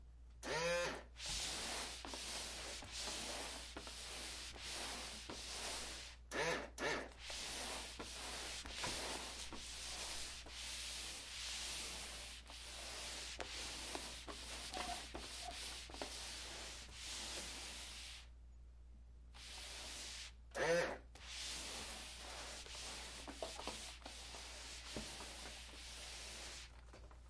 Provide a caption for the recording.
Swiffer spraying and cleaning tile bathroom floor.